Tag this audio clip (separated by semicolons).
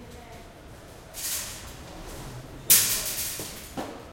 carrefour; metal; shopping; shoppingcart; supermarket